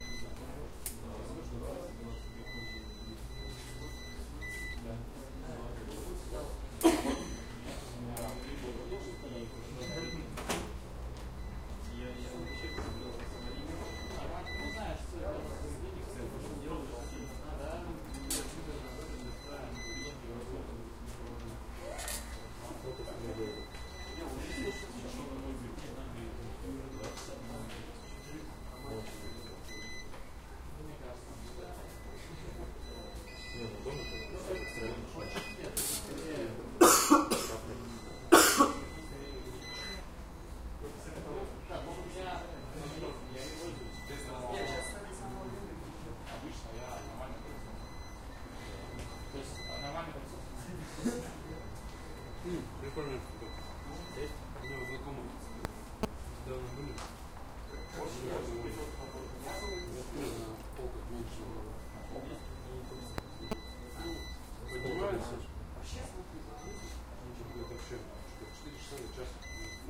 Office and UPS sounds 2
Suddenly electricity was lost in the office and UPS start to squeak.
Recorded 2012-09-28 03:15 pm.
AB-stereo
russian-speech, UPS-sound, pule, speaking, talks, office, UPS, squeak, noise